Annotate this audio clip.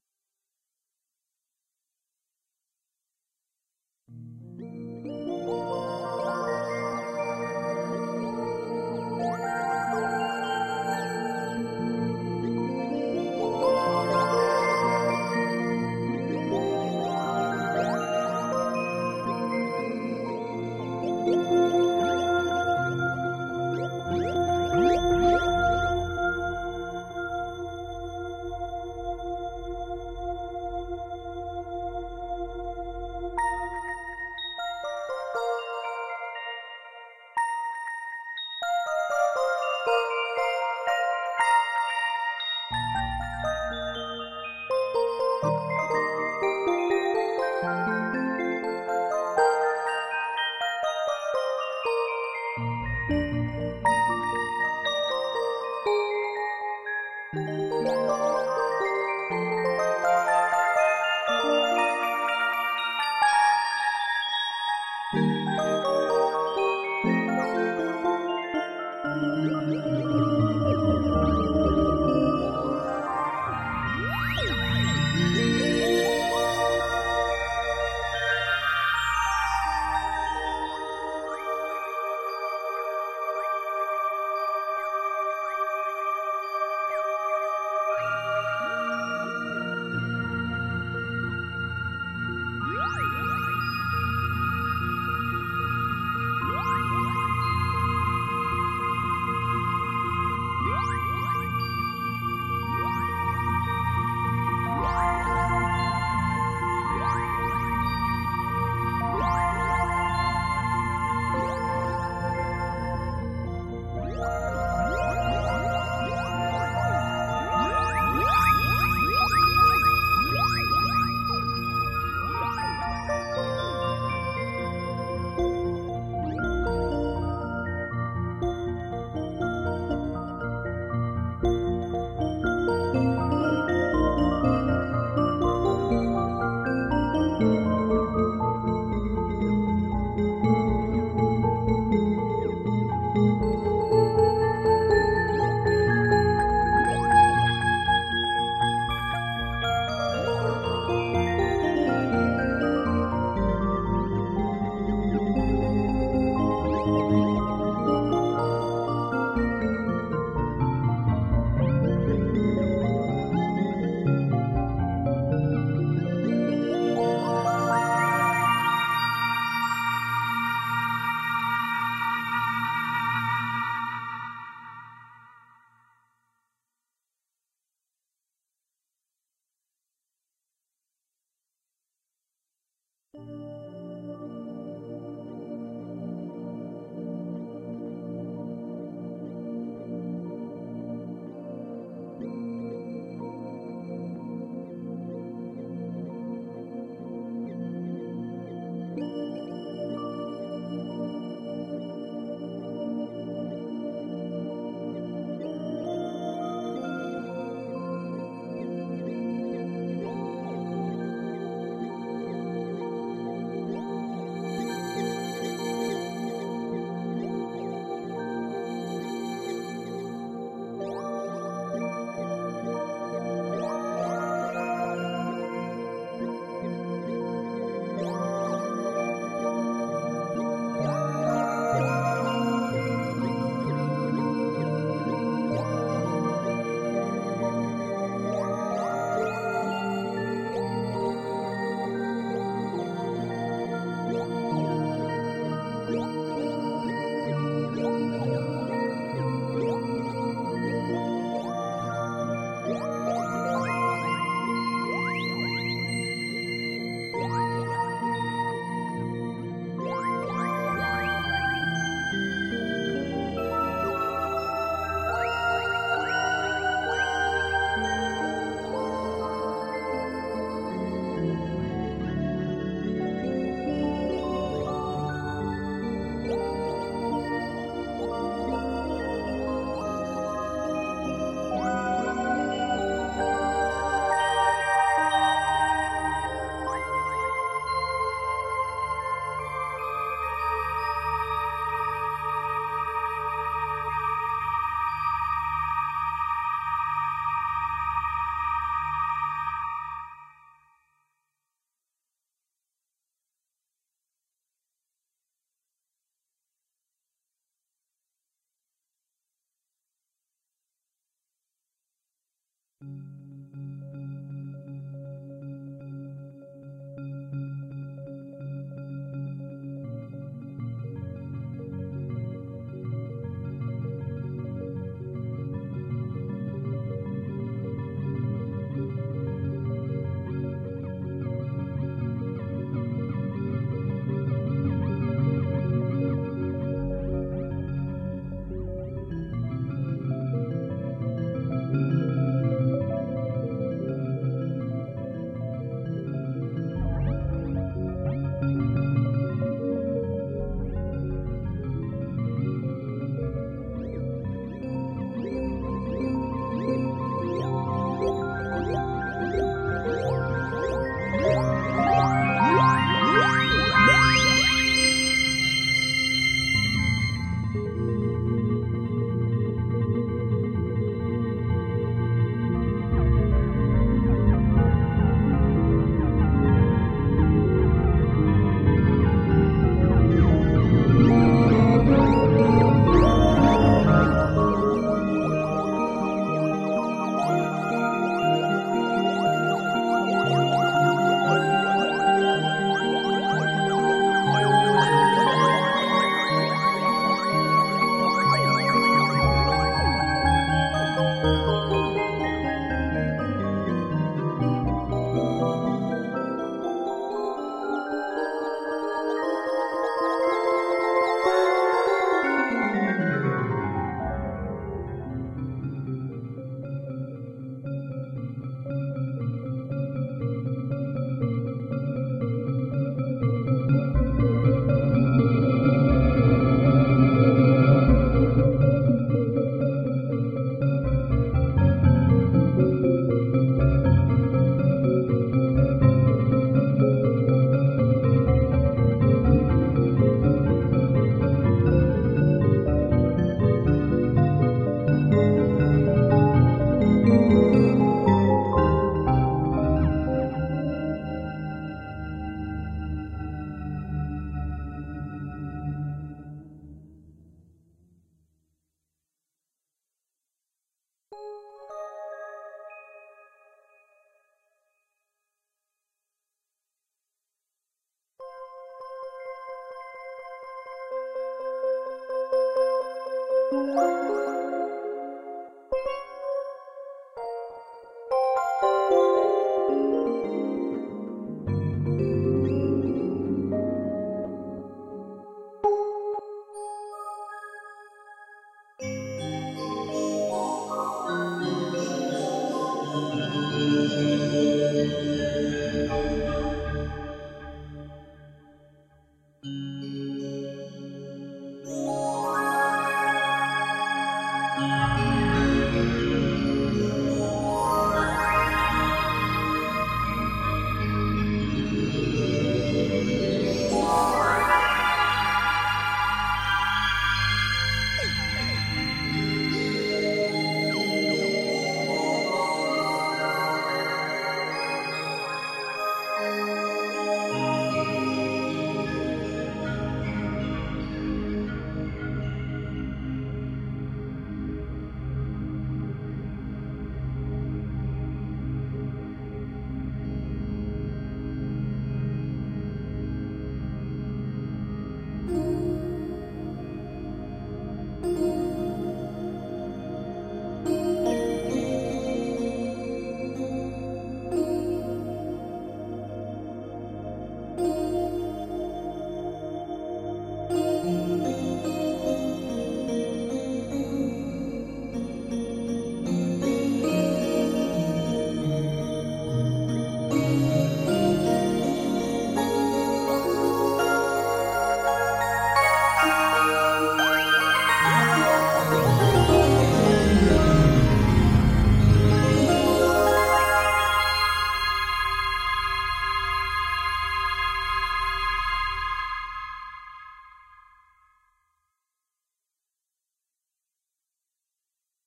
Soundscape Fairy 02
atmospheric, synth, fairytale